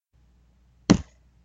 golpe niña emo en la cabeza contra la mesa
foley, saltos-sonido, variado, sonidos